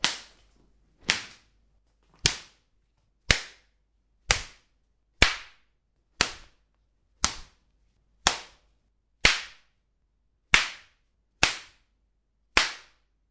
Belt Whip
Belt being used as a whip.
Recorded with Zoom H2. Edited with Audacity.